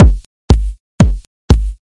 compressed kick loop variations drum beat drums hard techno dance quantized drum-loop groovy kick